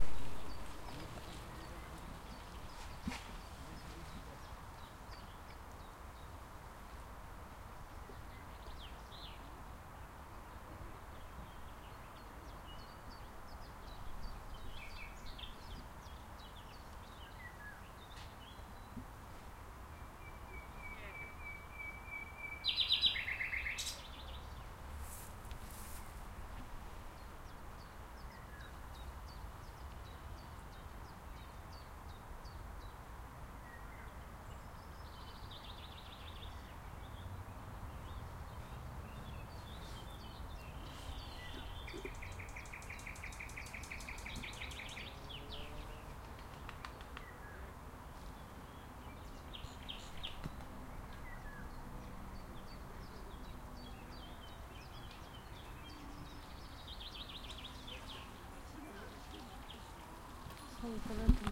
Słowik i wilga
This is nightingale and in the background oriole which are singing near the river. It was recorded with Zoom H2N (Xy).
cantando, ambient, Nightingale, singing, pajaro, soundscape, spring, beautiful-song, slow, field-recording, birdsong, oriole, birds, ambiance, ambience